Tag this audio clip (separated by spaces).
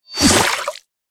Dagger Magic Spell Swing Swish Sword Water Wizard